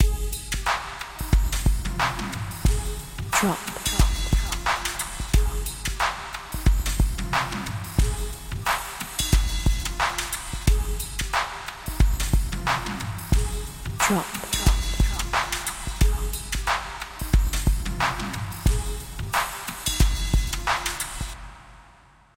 Hip hop beats vinyl
a hip hop beat oldskool sound
beat, beats, dnb, drum, drum-loop, drums, loop, percs, rhythm